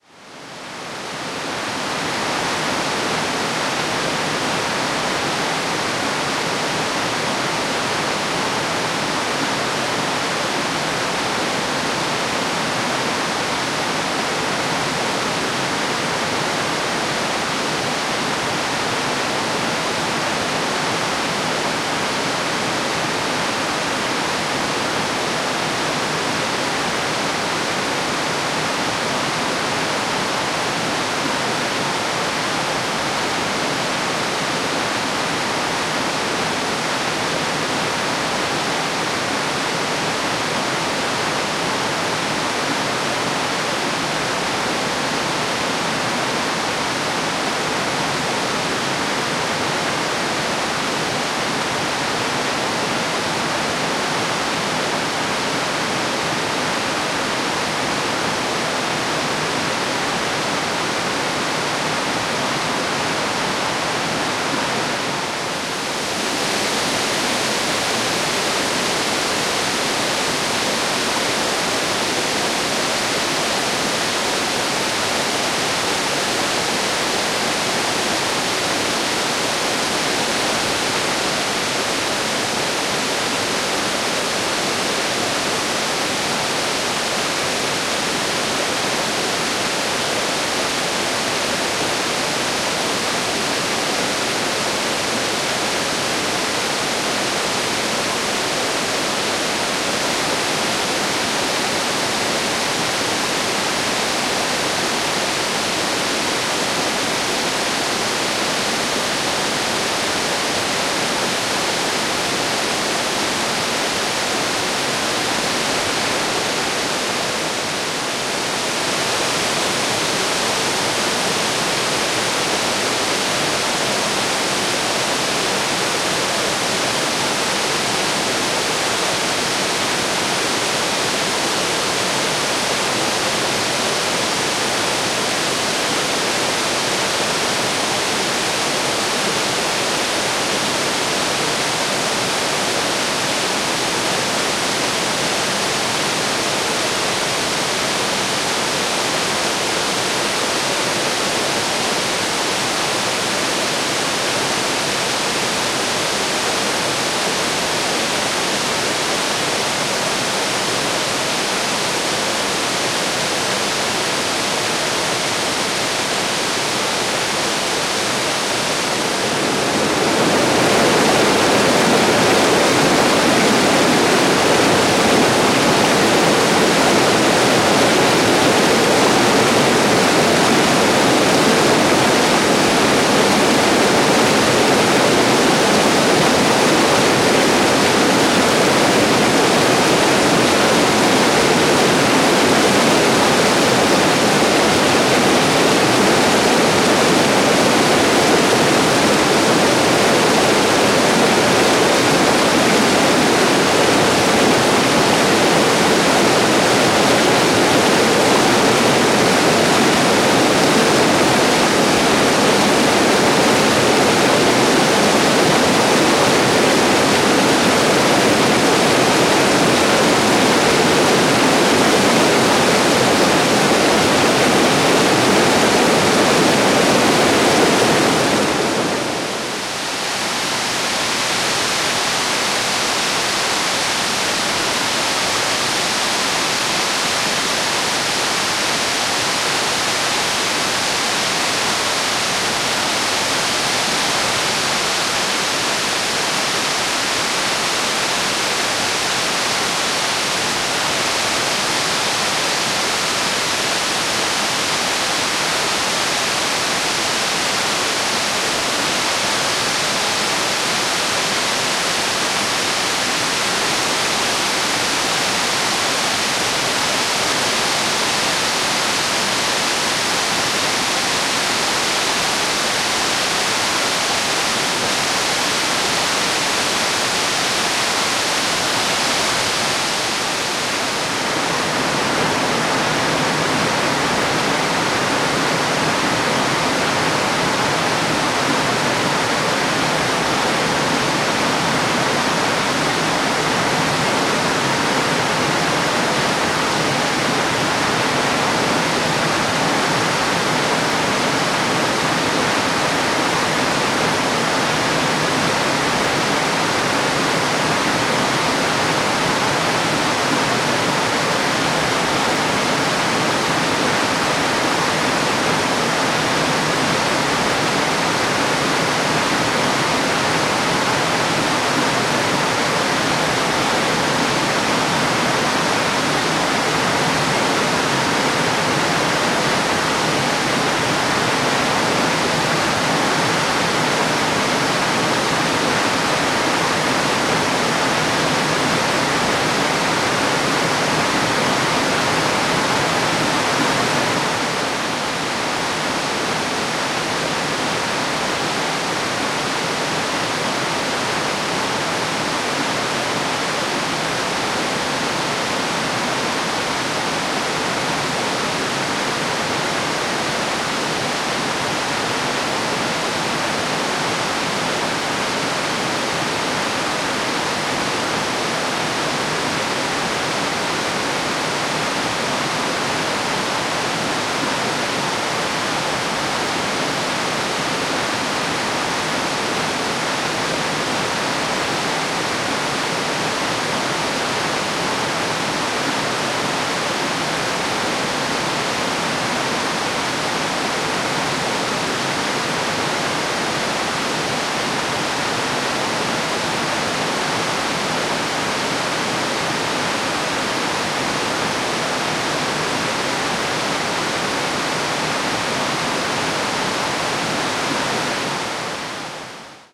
02.Lower-Falls
Sounds of the waterfall and river rapids on river Nevis.
waterfall, rapids, river